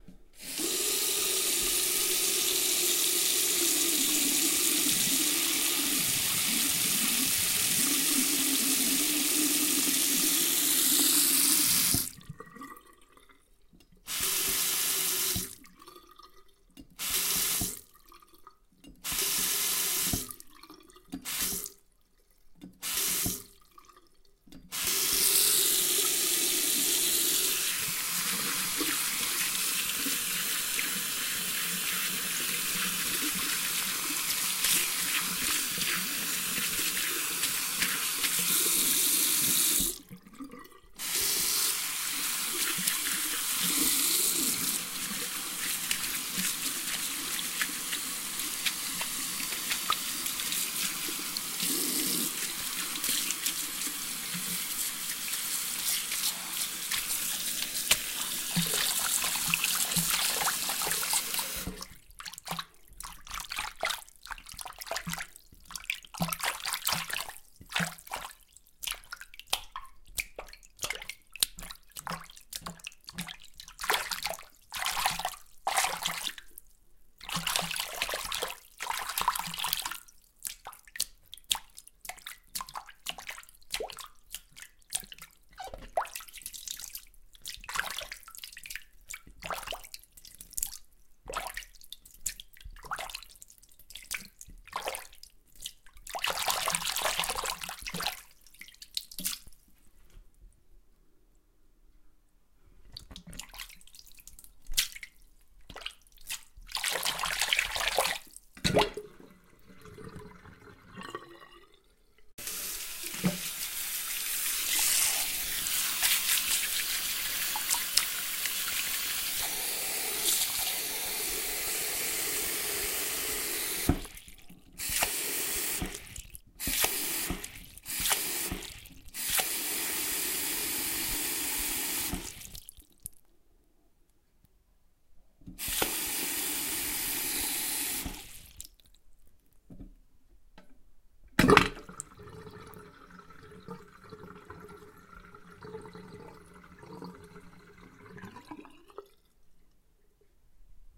sink water washing splishing splashing open washbasin vanity basin
Played a little bit in the bathroom washbasin.
Hope you like it.
bathroom, splish, sink, washing, dripping, tap, dripple, bath, faucet, splash, drip, water, drain, washbasin, running